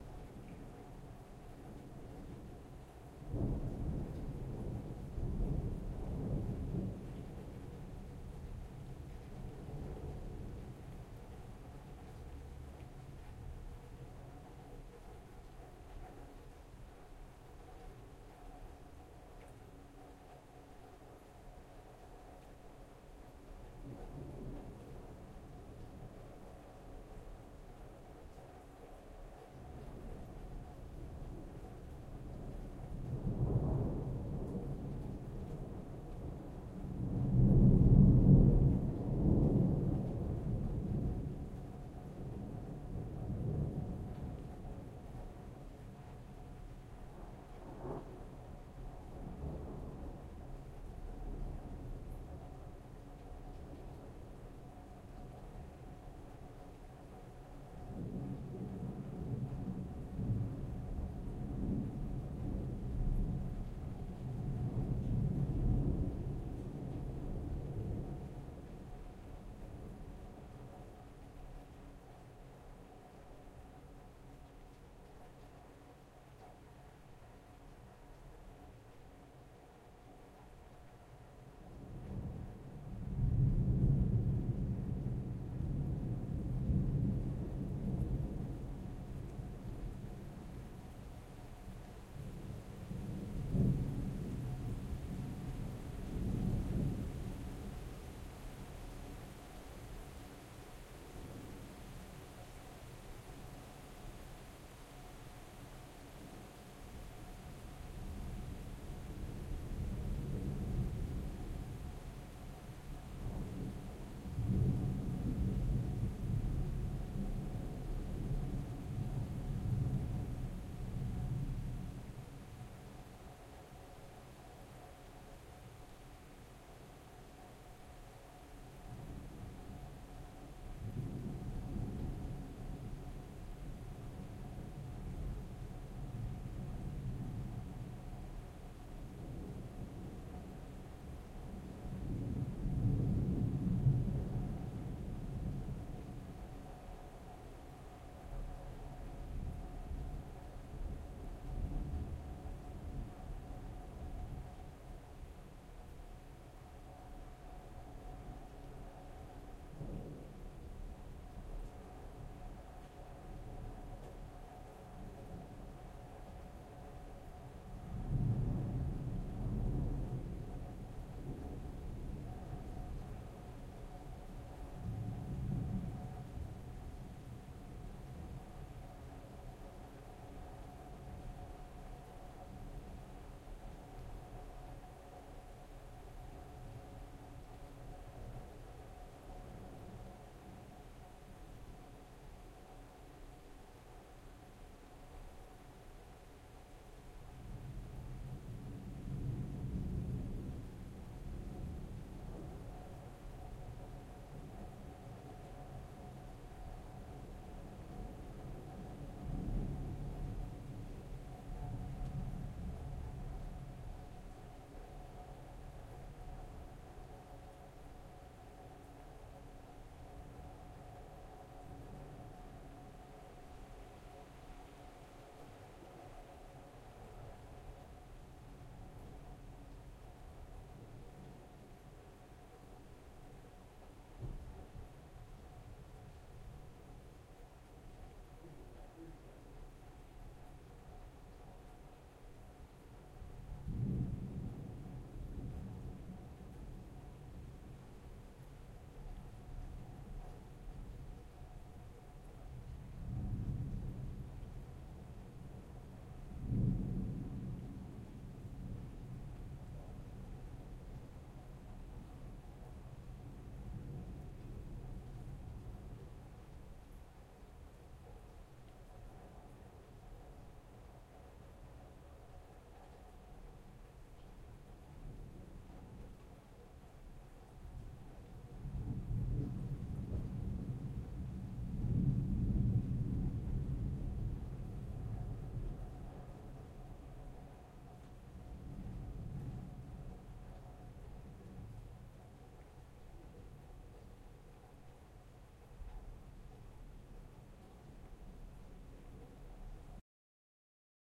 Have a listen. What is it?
WEATHER-THUNDER-Heavy thunder, medium rain, courtyard, echoes-0002
Weather sounds recorded in Tampere, Finland 2012. Rain, thunder, winds. Recorded with Zoom H4n & pair of Oktava Mk012.
courtyard, heavy, rain, thunder